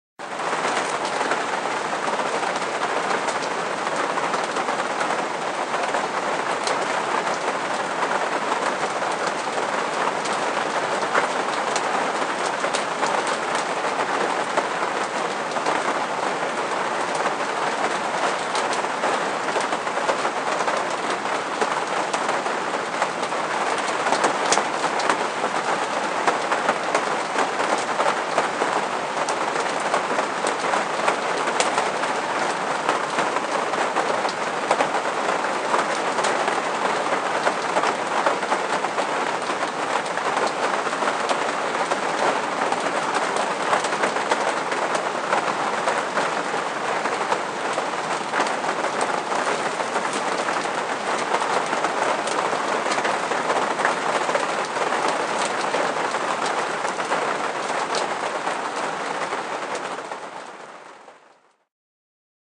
This is the sound of rain falling on a caravan roof in Normandy at night. Recorded with Voice Record Pro on an iPhone 4S. Modified to improve stereo spread in Logic.